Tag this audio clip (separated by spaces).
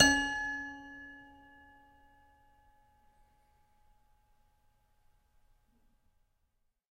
collection toy